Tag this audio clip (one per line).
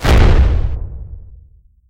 bomb
dynamite
explode
explosion
explosive
firearm
firing
grenade
gun
loud
musket
shoot
shot